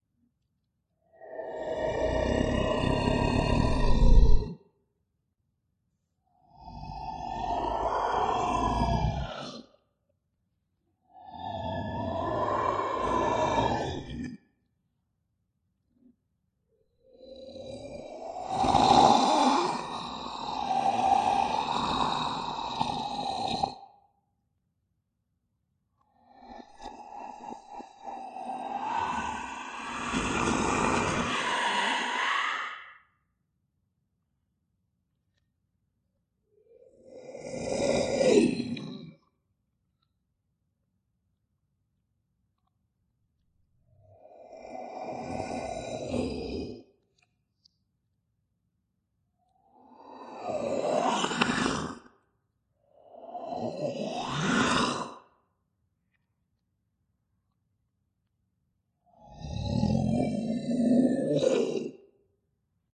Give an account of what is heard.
DINOSAUR ROAR
Roar for dinosaurs fans
beast, Dinosaur, growl, scary